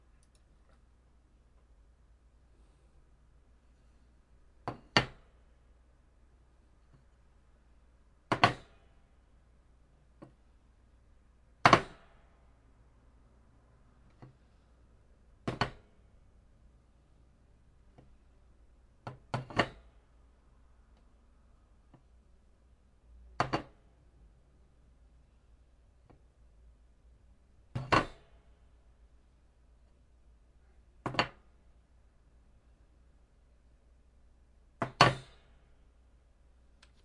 Plates Being Placed
A collection of sounds of a plate being placed on a table at various levels of intensity. Recorded on Blue Snowball for The Super Legit Podcast.
restaurant, table